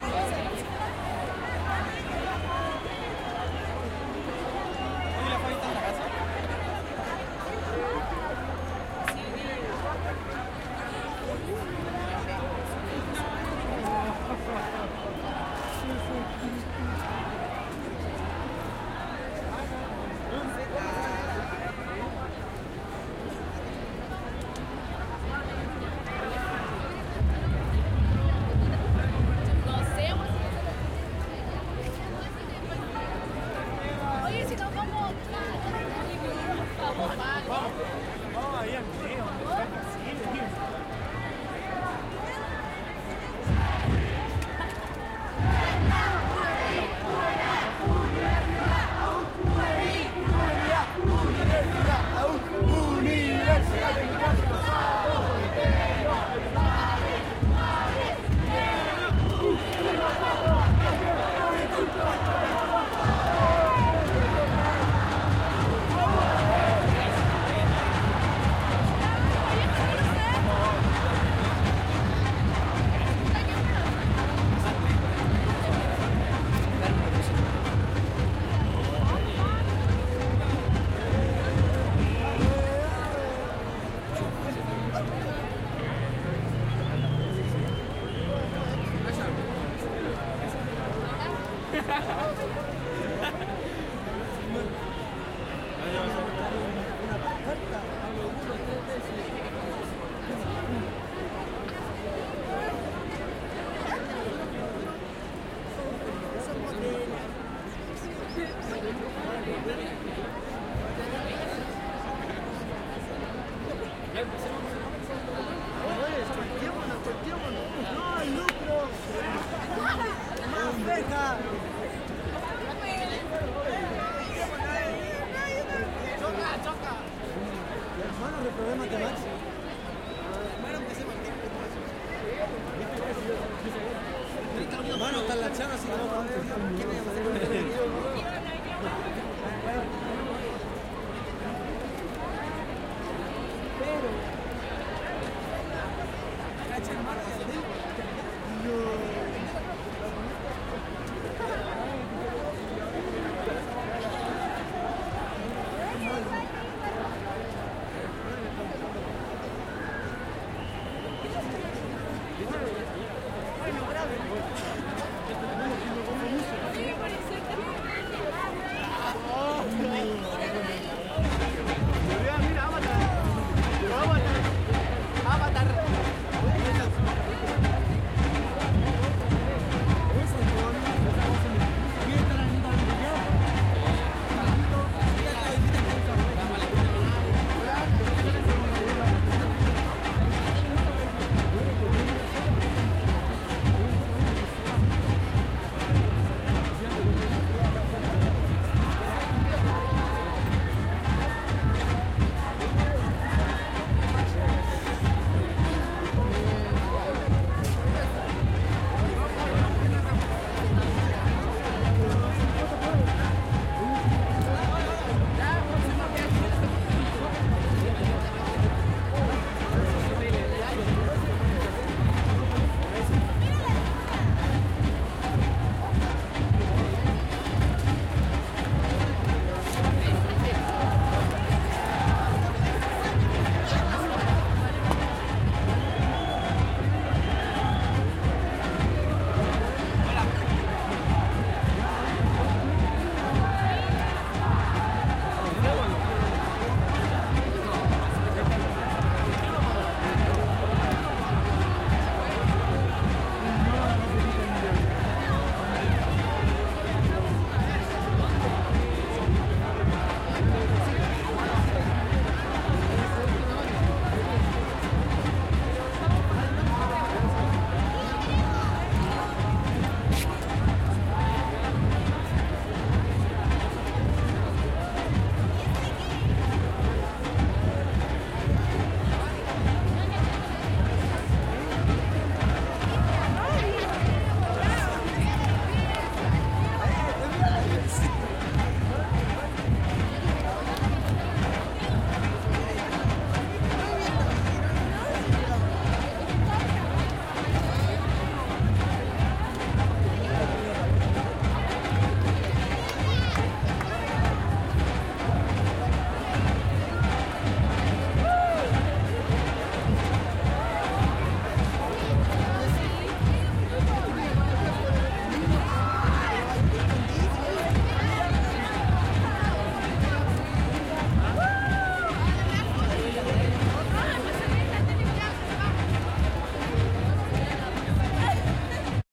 besaton por la educacion 02 - UNI UNA
Besatón por la educación chilena, Plaza de Armas, Santiago de Chile, 6 de Julio 2011.
Cánticos de estudiantes de la USACH.